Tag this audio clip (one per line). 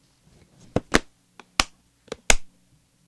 box
case
closing
gun